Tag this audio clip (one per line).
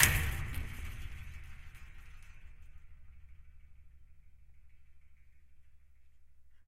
percussive
smack